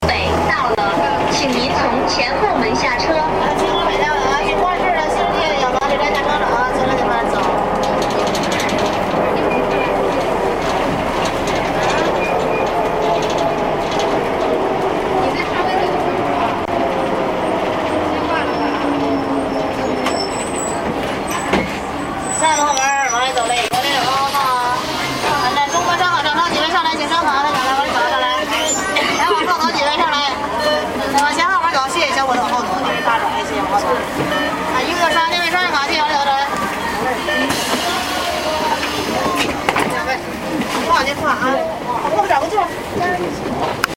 Taking a bus in Beijing (to Tiantan)
Taking a bus in Beijing